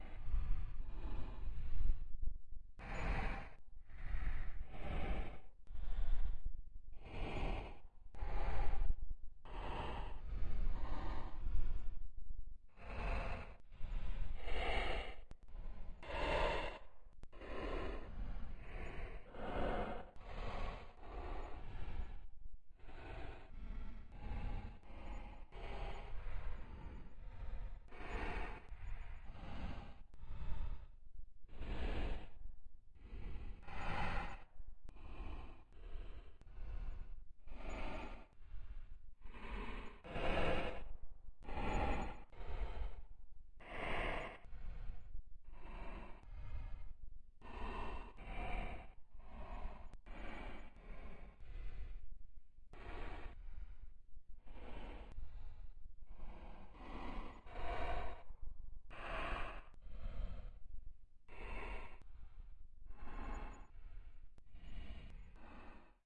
Irregular Mechanical Breathing Drone
A modified effect of my boyfriend drumming a tune on his chest.
irregular, mechanical, drone, breathing